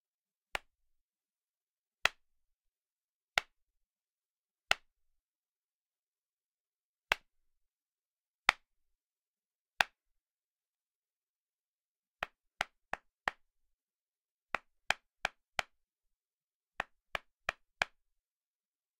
Snapping a belt with different levels of hardness.
Belt, Clap, Punch, OWI, Snapping, Thudding